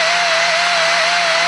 Atlas Copco g2412 straight die grinder running freely, short.